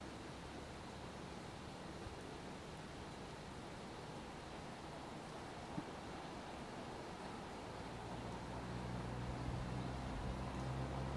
Recording from a forest. No process applied.
nature, river, wind, Forest